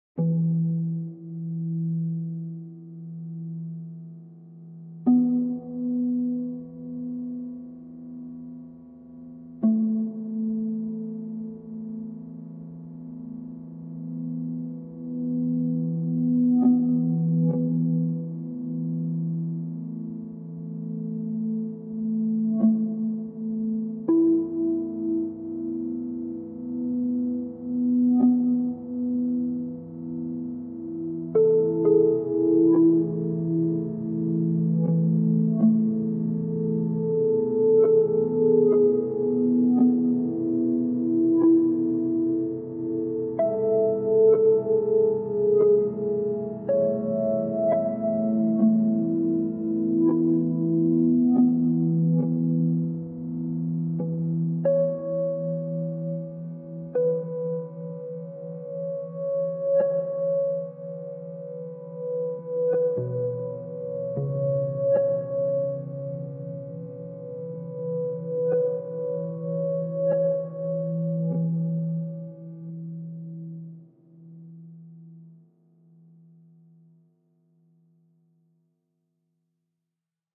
Dreaming of a night
Ambient (slow ping-pong) flageolets scenic music in blue.
ambient, dark-blue, flageolets, scenic-music